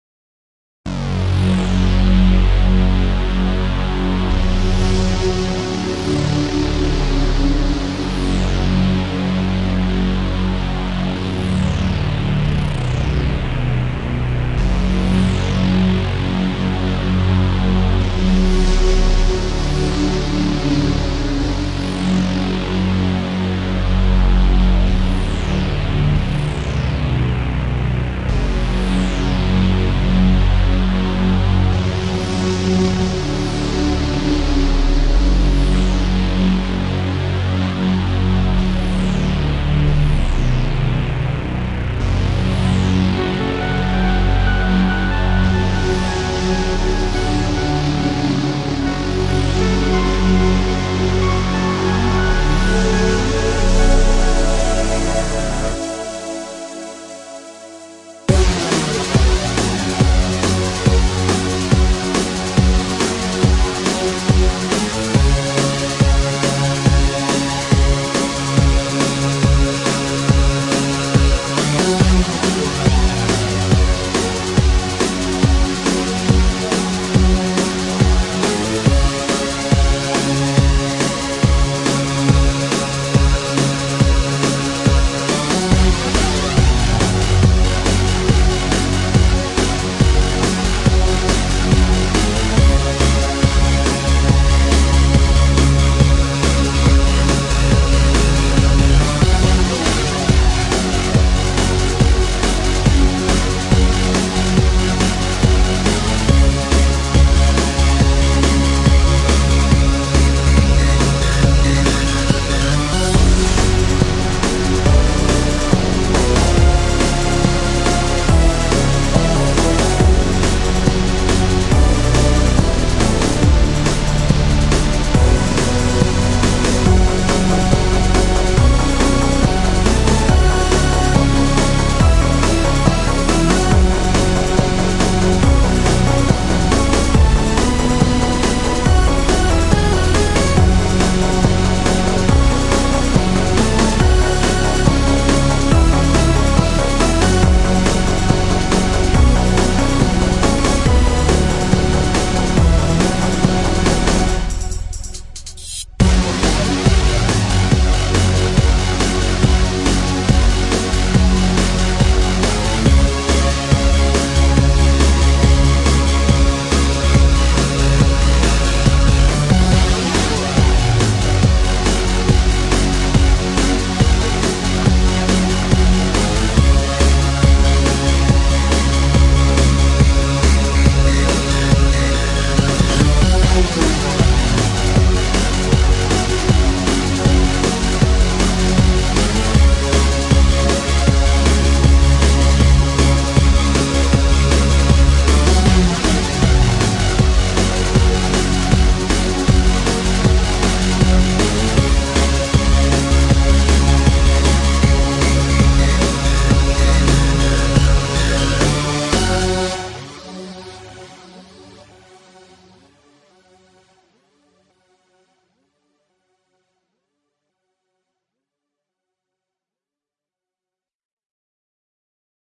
Hello and welcome!
Before creating my game, I've created music.
Unfortunately, it turned out that the created music does not match the atmosphere of the game I'm working on in any way.
If you think that the soundtracks might be useful to you, please use it!
I am 1 dev working on the game called Neither Day nor Night.
Check it out!
(And preferably a link to the Steam or Twitter if possible!)
Enjoy, and have a good day.
#NeitherDaynorNight #ndnn #gamedev #indiedev #indiegame #GameMakerStudio2 #adventure #platformer #action #puzzle #games #gaming
indiedev, soundtrack, electro, Synthwave, music, 90s, NeitherDaynorNight, 80s, hotline-miami, games, platformer, song, gamedev, synth, retro, video-game, action, electronic, gaming, techno, adventure, miamivice, indiegame, retrowave, ndnn